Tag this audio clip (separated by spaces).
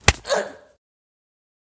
Impact Voice Female